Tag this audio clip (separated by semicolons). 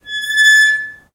door; metal; open; squeak